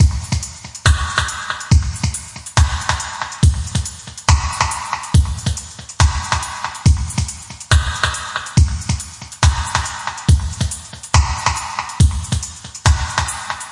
DM 70 DRUMS STEPPERS FX 2 SLOW
DuB HiM Jungle onedrop rasta Rasta reggae Reggae roots Roots
DuB, HiM, Jungle, onedrop, rasta, reggae, roots